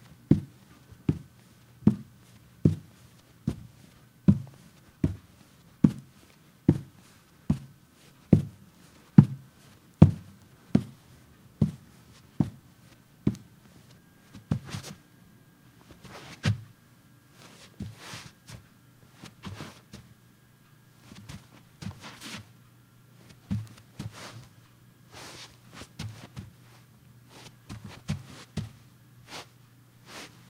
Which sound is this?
Footsteps, Solid Wood Rug, Male Boots, Medium Pace and Scuffs
Footsteps, male boots on solid wood with a rug, at a medium pace and scuffs
man, scuff, wood, foley, male, solid, footsteps